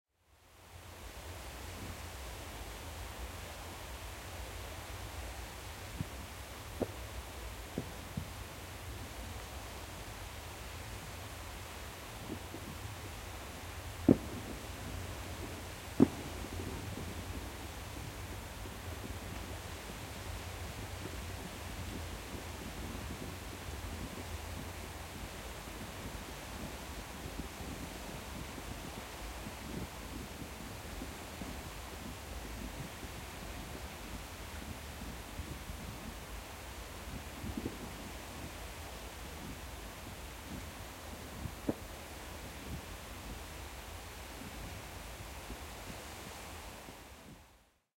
20170101 Pattaya Beach at New Year Celebration 03
Pattaya Beach at New Year Celebration, recorded with Rode iXY.
fireworks, newyear, beach